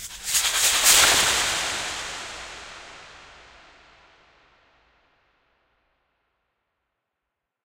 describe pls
microphone + VST plugins
sfx, sound